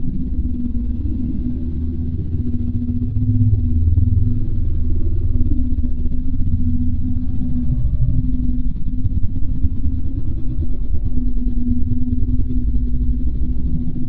Portal Continuous Rumble
Synthetic ambiance that suggests some sort of alien mechanism. It uses complex modulations on notch-filtered (via FFT) noise. This sound is one within a series I created years ago for a radio theater fantasy series -- it is supposed to be the main sound of a "portal" while it is running correctly. This would be mixed with other sounds for start-up and shut-down, etc. This file should be a seamless loop, if I remember correctly. The rest of the series, also beginning with the name "Portal", can be found in my "SciFi" pack. This one is useful as a stand-alone ambient background loop, however, and thus it is being placed in my "backgrounds" pack. If you like the "portal" aspect of this, be sure to check out the siblings in the "SciFi" pack of mine.
horror, sci-fi, loop, ominous, alien, fantasy, mechanism, transporter, ambient, background, portal, eerie